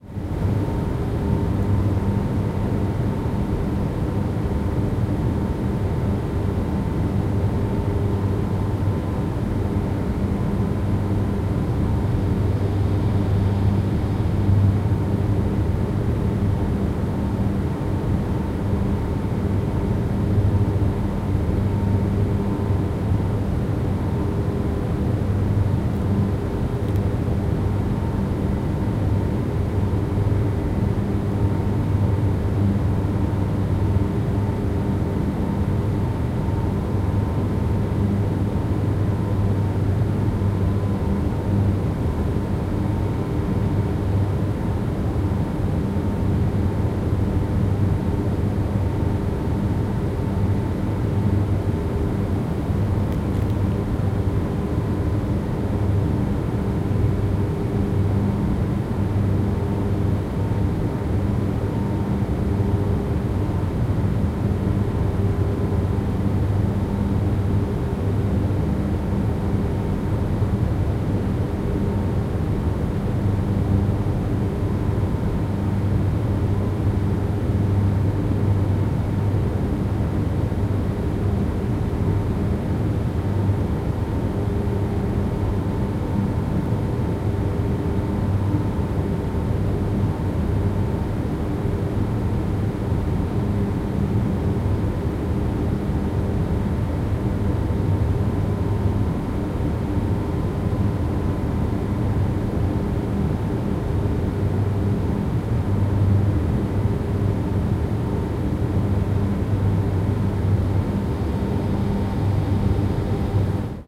Roomtone ParkingGarage Zwolle Ground Rear
Rear recording of surround room tone recording.
sounddesign, roomtone, surround